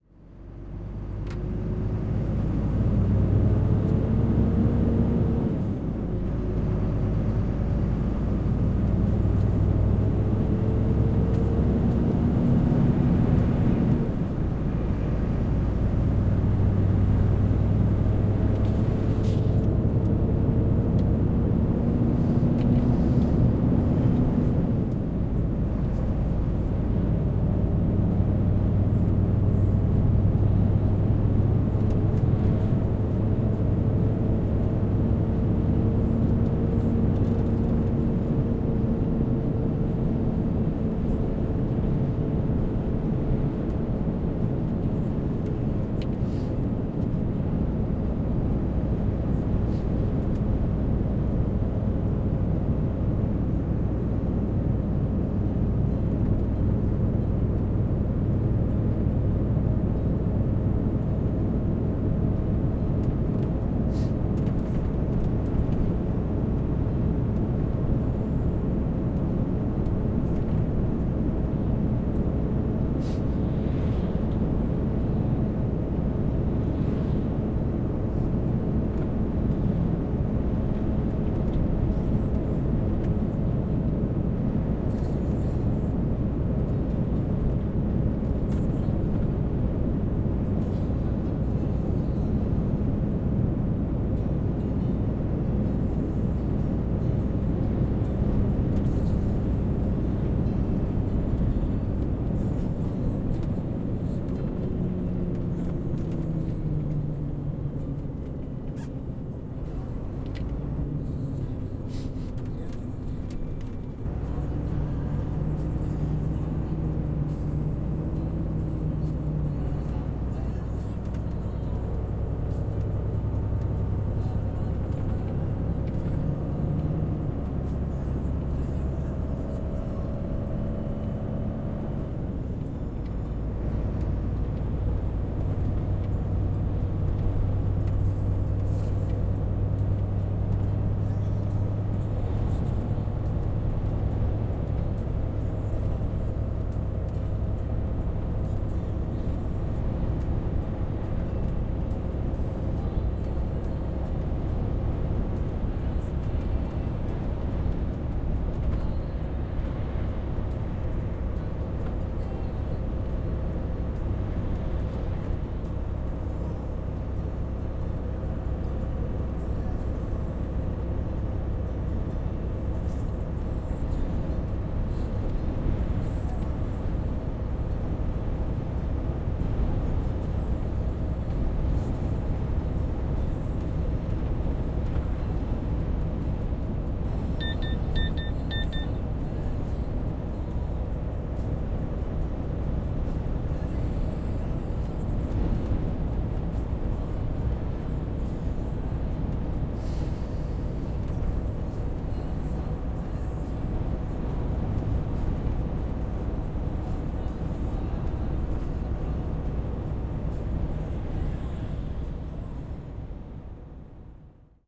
110810-bye bye wild car park
10.08.2011:eleventh day of ethnographic research about truck drivers culture. Entry from the wild car/truck park in Bajle in Germany. Noise made by truck engine, swoosh of converter, German radio, navigation signals, sighing truck driver.